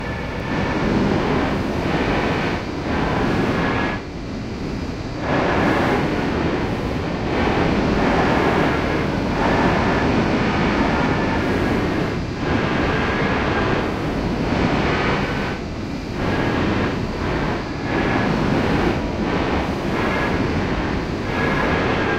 Noise of a paranoid brain. Visions and fear all around.
Edited with Audacity. Reverse and paulstretch filters used mostly.